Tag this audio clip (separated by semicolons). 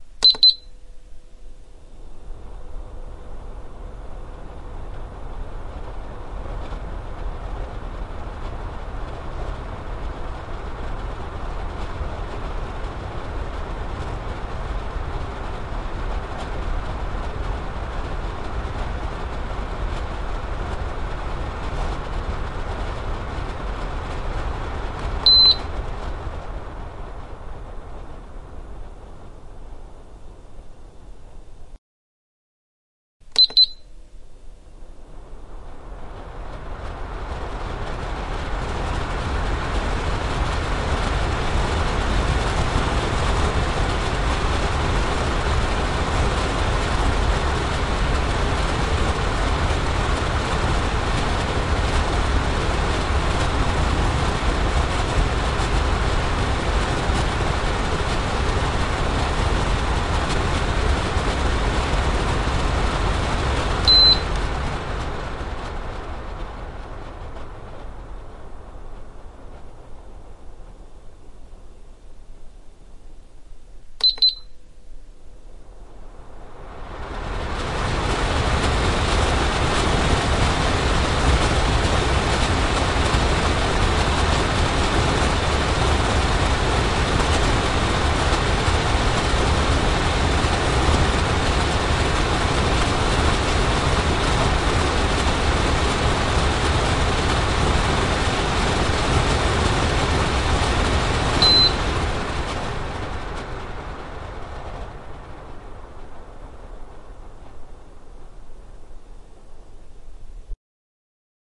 air-flow; appliances